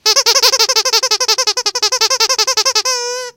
Squeaky Toy 3
Made by squeezing a squeaky toy